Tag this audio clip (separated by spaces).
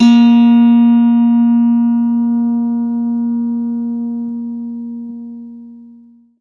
noise string instrument detuned acoustic organic